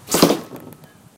So, I took three mono recordings outdoors with my iPhone with Voice Memos, and made it a stereo recording in Audacity. Made the first to the right, the second to the left, and the third in mono. Sounds like three archers shooting at the same time. My iPhone was very close to the target. (probably right behind it)
archers, archery, arrow, bow, bow-and-arrow, impact, outdoors, shoot, shot, sports, stereo, weapon